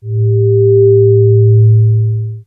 slobber bob G
Multisamples created with Adsynth additive synthesis. Lots of harmonics. File name indicates frequency. G
additive, bass, metallic, multisample, swell, synthesis